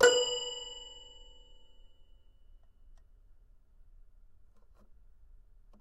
Toy records#17-B3-01
Complete Toy Piano samples. File name gives info: Toy records#02(<-number for filing)-C3(<-place on notes)-01(<-velocity 1-3...sometimes 4).
toy, piano, toypiano, sample, instrument, toys, samples